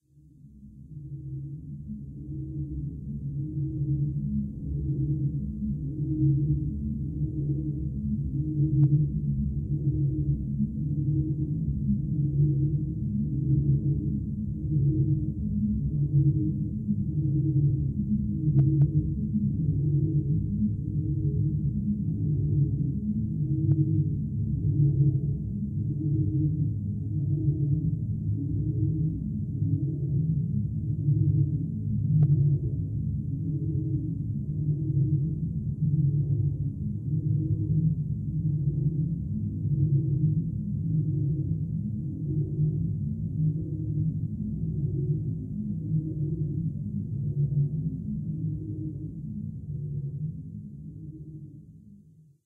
Atmospheric sound for any horror movie or soundtrack.
Terror, Atmosphere, Scary, Freaky, Evil, Horror, Halloween